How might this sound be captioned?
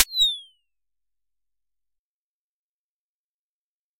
Tonic hihat EFX
This is an electronic hi-hat effect sample. It was created using the electronic VST instrument Micro Tonic from Sonic Charge. Ideal for constructing electronic drumloops...
electronic, drum